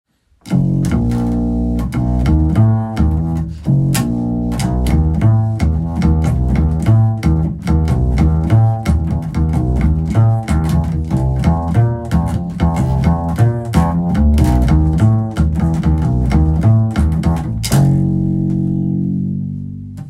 Double bass Jazz loop